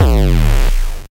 GNP Bass Drum - What's In The Box
Distorted kick drum sound with a phaser-like tail.
bass-drum, distortion, kick-drum